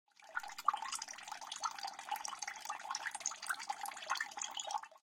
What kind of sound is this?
The sound of falling water
Falling, dripping